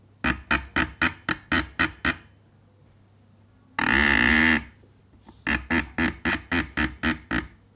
sounds produced rubbing with my finger over a polished surface, my remind of a variety of things